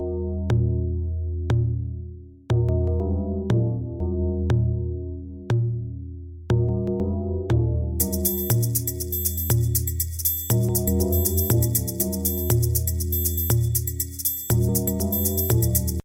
church beats
beat, church